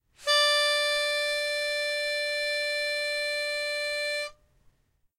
Guitar Pitch Pipe, D4
Raw audio of a single note from a guitar pitch pipe. Some of the notes have been re-pitched in order to complete a full 2 octaves of samples.
An example of how you might credit is by putting this in the description/credits:
The sound was recorded using a "H1 Zoom V2 recorder" on 17th September 2016.
D, guitar, pipe, pitch, 4, sampler, instrument